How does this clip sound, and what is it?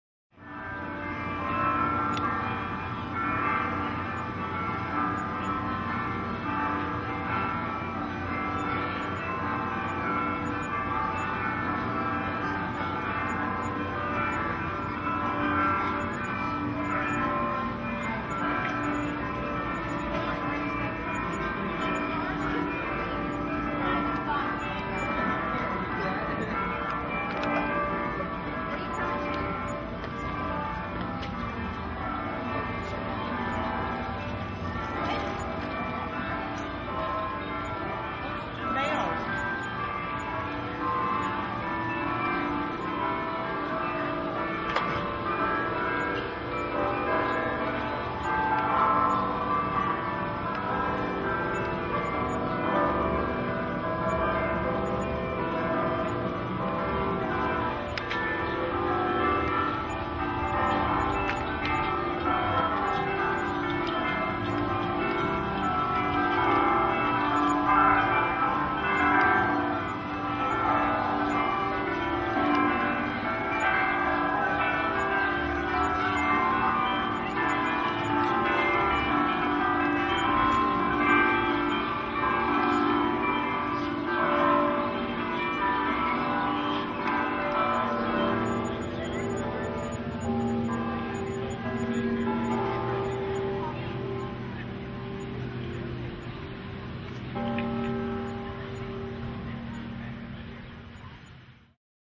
Bells-Church in St Augustine
Church bells in St. Augustine Florida with street noise in the background.
church, bells